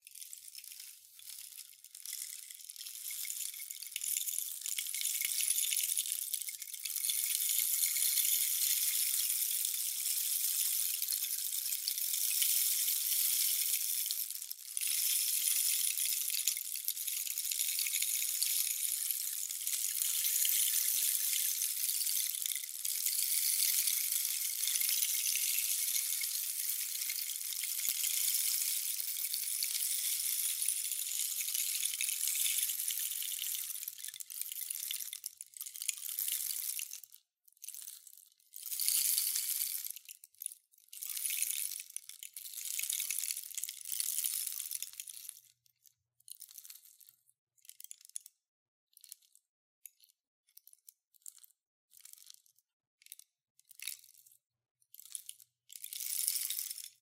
A slowly tilted small rainstick, stopping intermittently. Recorded w/ Soundbooth and a Sterling MT-66, -10db cut and low roll off.